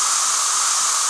spray loop
Recorded in a bathroom with an Android Tablet and edited with Audacity.
toilet, drip, drain, bathroom, spray, flush, water, poop, flushing, plumbing, squirt, restroom, pee